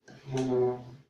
The sound of a wolf growling
animal, growling